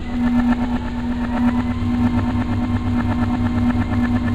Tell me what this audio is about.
washingmachine effects
A washing machine with some dishes on the top of it, and I added some effects with audacity. Rec with R09.
engine, washingmachine